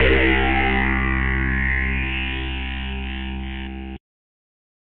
A acid one-shot sound sample created by remixing the sounds of